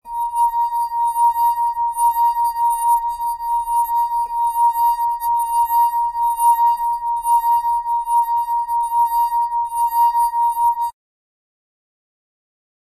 19 Tehoste lasinsoitto8
A clean sound of playing a wine glass
glass, resonance, ringing, wineglass